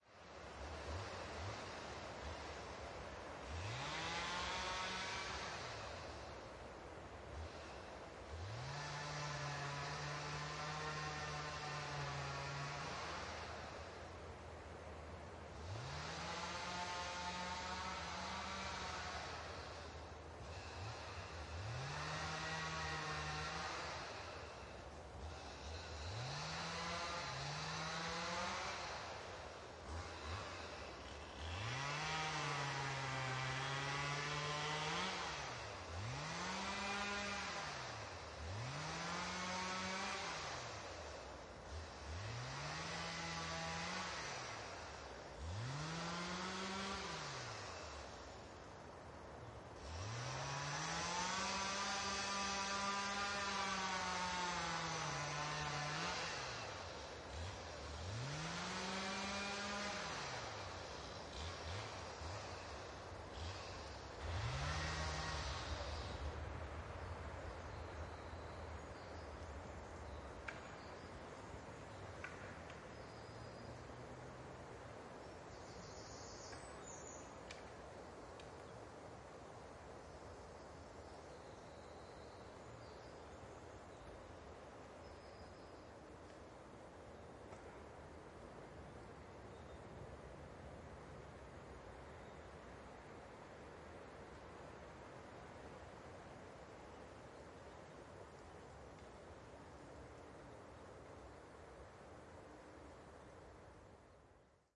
Chainsaw sounds deep in the forest
"Bois de L'Ermitage", a forest surrounding the Villers Abbey,Villers La Ville, Belgium.
Recorded the 21st of february of 2014, at 12pm.
2x Apex 180 ORTF - Fostex Fr2le
chainsaw, forest, Villers-la-ville